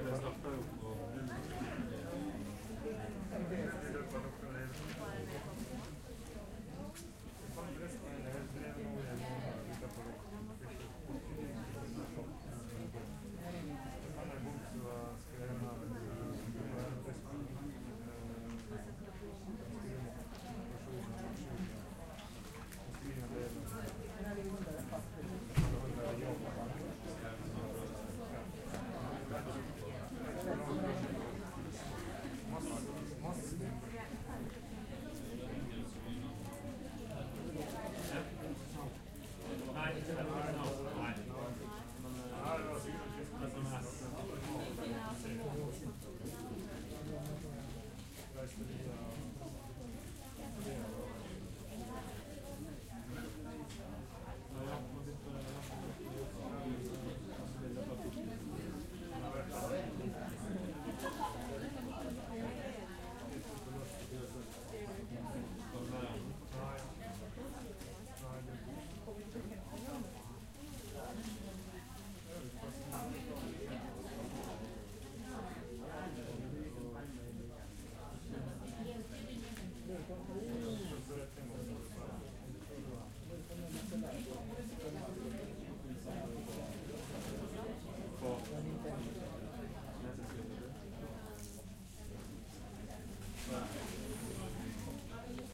in the cinema
Recorded this voices in norwegian cinema during one festival.
ambient, audience, speaking, talking, voices